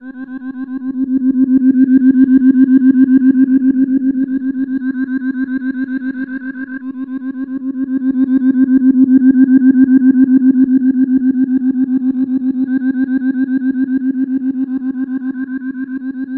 Bleepy mellow drone sound with a retro sci-fi scanner feel from a Clavia Nord Modular synth.